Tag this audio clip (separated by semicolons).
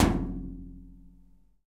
clang; hit; bang; metal; percussion; strike; impact; percussive; thunk; emptiness